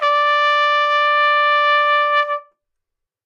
Part of the Good-sounds dataset of monophonic instrumental sounds.
single-note, sample, trumpet